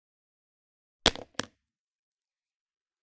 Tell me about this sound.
iPhone drop
iPhone being dropped, causing the screen to smash, on a tiled floor.
Recorded in my bathroom with:
Pro Tools 10
MacBook Pro Mid 2010
Avid MBox Mini
Røde NTG2
Light noise reduction applied in RX2
broken, iPhone, Dropped, screen, sfx